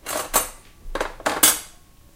Rummaging through the cutlery drawer.